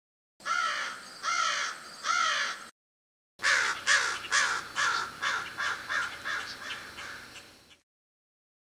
Recorded on my camcorder in Lanaudiere, Quebec
Bird, Crow, Naure